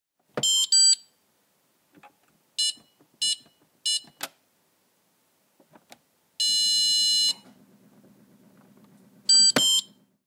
When you select the program on my dishwasher, there is this cool bip. A retro sci-fi vibe.